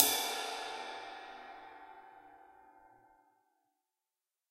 Ottaviano22HalfLathedRideCymbal2715gBow
Ottaviano ride cymbal sampled using stereo PZM overhead mics. The bow and wash samples are meant to be layered to provide different velocity strokes.
cymbal, drums, stereo